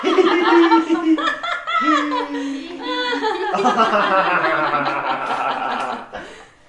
Volk lacht
Recorded in 2006 with TASCAM DR 05 (built ind mics) inside the house and cut, mixed and mastered with Logic Pro 8 (MacBook 13")
Good for Puppeteers !!
Folx, German, Laughing, female, male, puppeteers